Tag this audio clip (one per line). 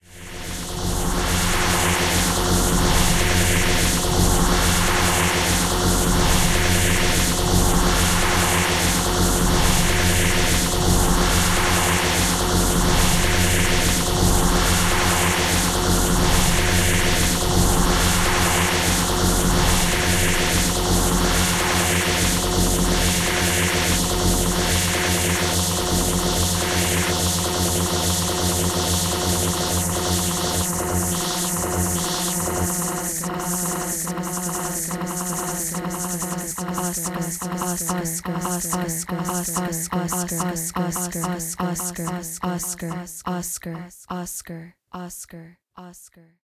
dragon
remix
voice